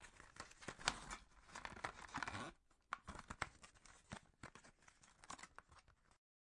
Plastic Bend
The sound of a plastic package being opened and bending
Plastic-Bending, OWI